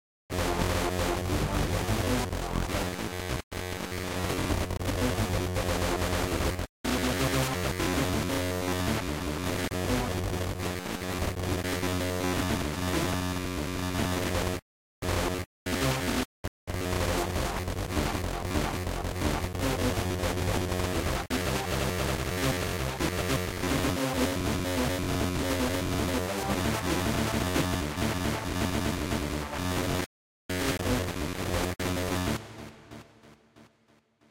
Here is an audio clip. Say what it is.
FL Studio. Created a song. Dragged the playlist start/stop point back and forth quickly.
digital, electric, electro, electronic, experimental, lo-fi, noise, processed, static